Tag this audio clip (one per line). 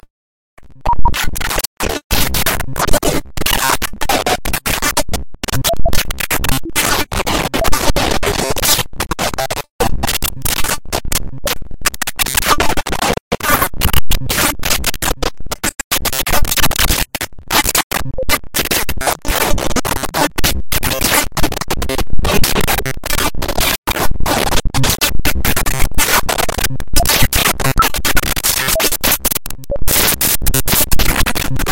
glitch
soft-noise